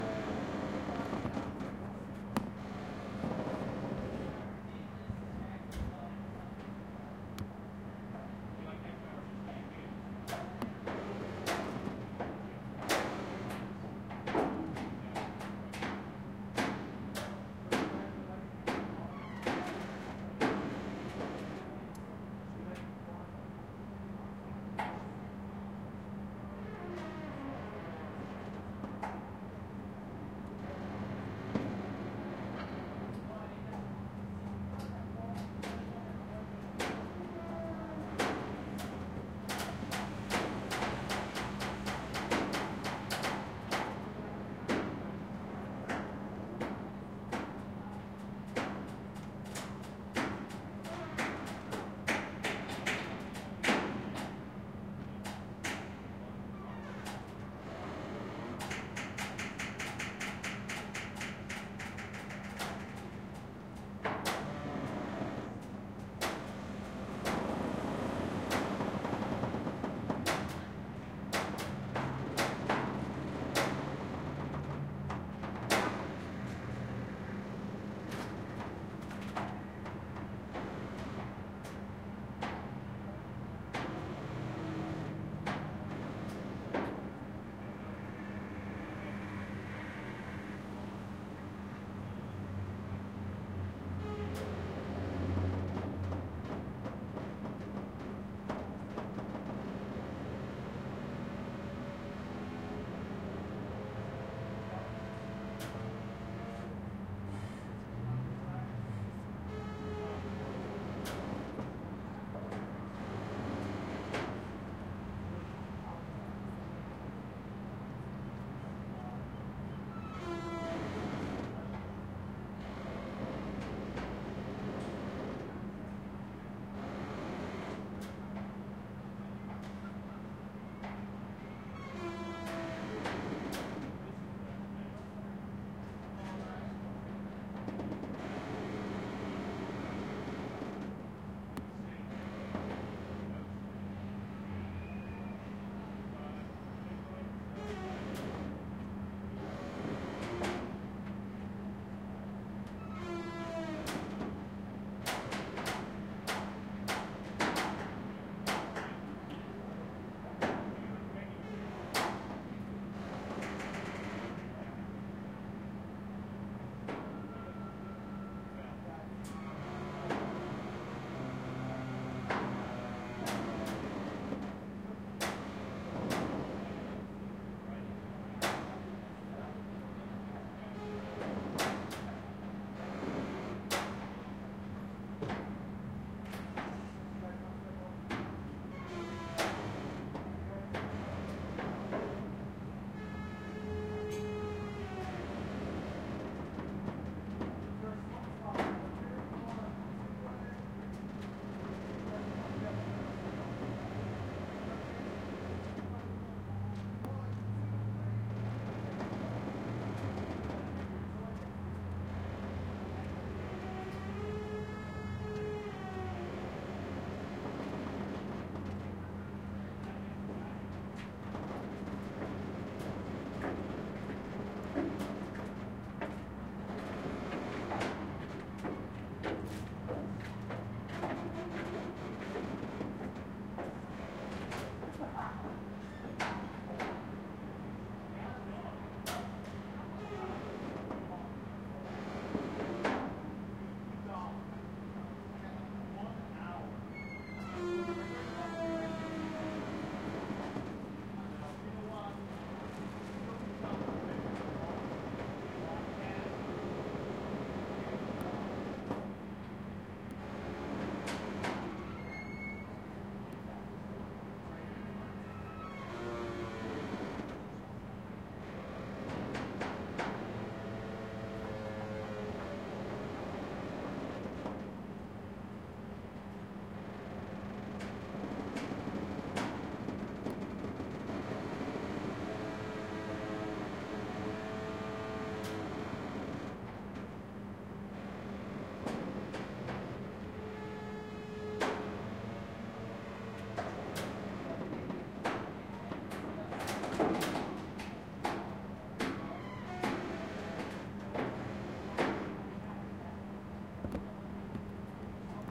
USS Iowa at Anchor-2
The battleship USS Iowa is now a museum, docked at San Pedro, California. After touring the ship--which was really interesting--I noticed and recorded these sounds. Where the ship's gangplank meets the pavement, the movement of the water causes the metal to scrape, pop and creak. Recorded 14 April 2014 using a Zoom H2.
battleship, creak, gangway, metal, naval, navy, pop, popping, scrape, Ship, squeak